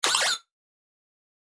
Retro Game Sounds SFX 56
Shoot, Sounds, effect, fx, pickup, sounddesign, soundeffect